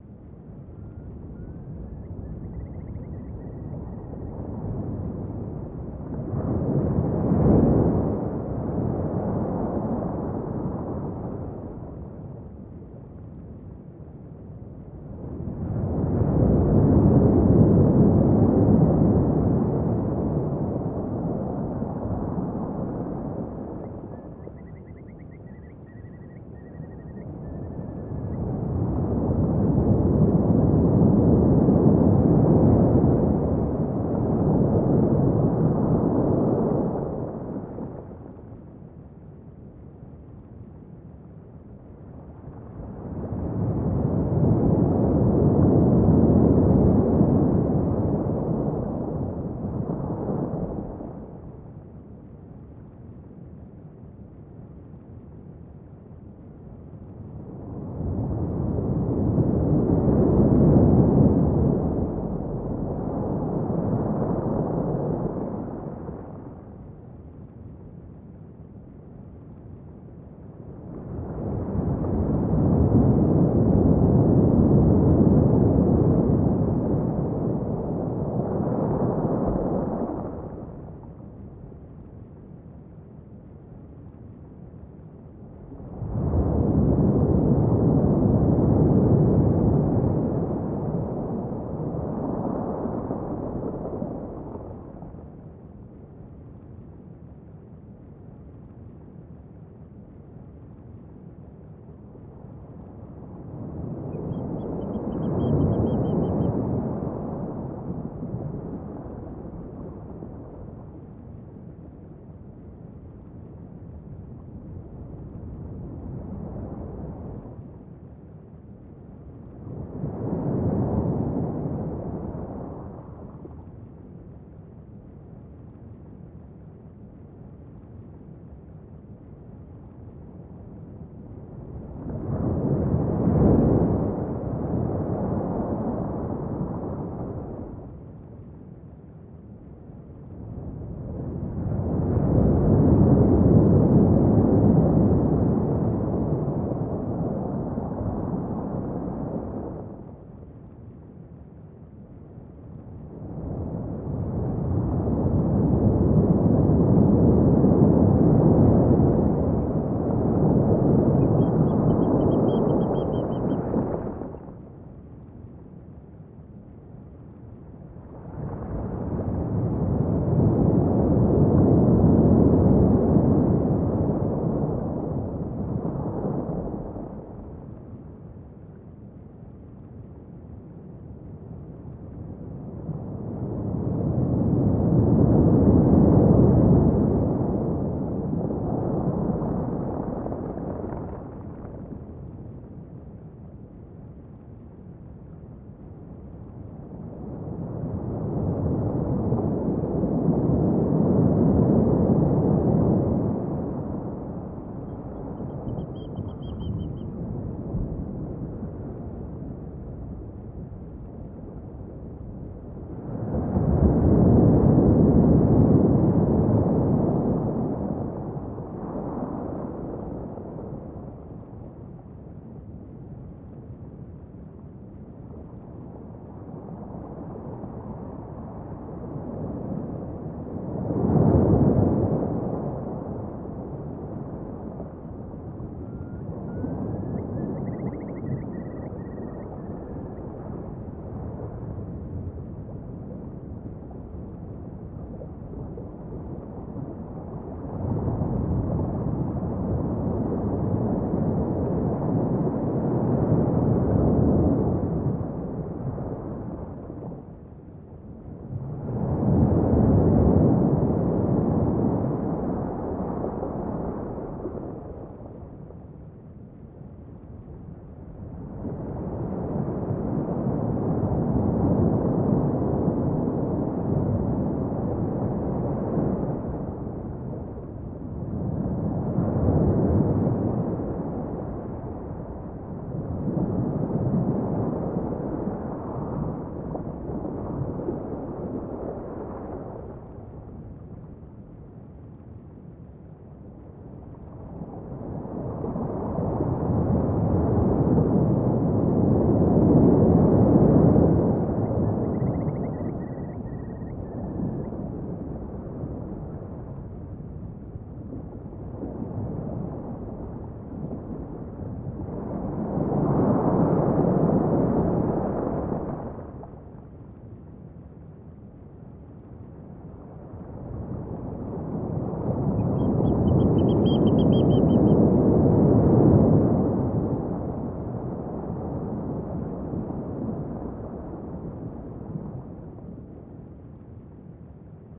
A friend of mine spent his childhood in a seaside village which has a pebble beach, he has lived inland for years now. I asked him one day what sounds he missed most from living at the seaside, his reply was - "Waking up early in the morning and hearing the waves had changed overnight to a swell, distant Oystercatchers and Curlew calls coming and going in the wind. Time to get up and beachcomb before anyone else gets there,good pickings after a swell."
This is the file I sent him.
beach, curlew, pebble-beach